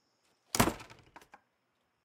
Door Slam
A door being slammed shut
close, door, slam, wooden